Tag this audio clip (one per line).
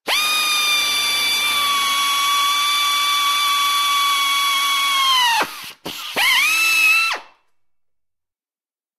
80bpm
tools
work
pneumatic-tools
pneumatic
desoutter
metalwork
3bar
air-pressure
drill
crafts
labor
motor